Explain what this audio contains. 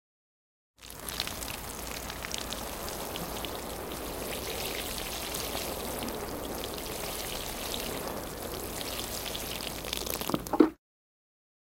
Watering with a Watering Can
Watering can. Can be also used as some other water sounds as rain. Recorded with JJC SGM-V1. Thanks!
can, Garden, Outside, Rain, Summer, Water, Watering